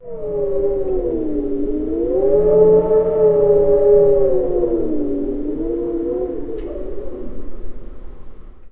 strange wind
ghost, horror, horror-effects, horror-fx, terrifying, terror, thrill, wind